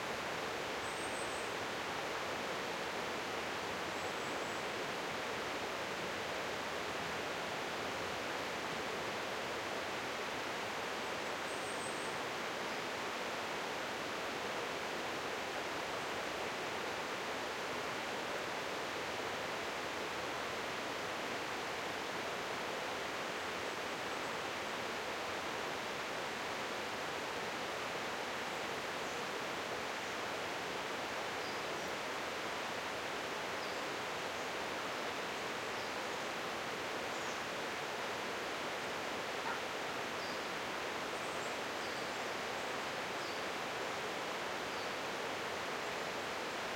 forrest and river
a forrest with a small river afar. Stereo. Recorded on Marantz PMD 66O and a pair of Senheiser K6 cardioid.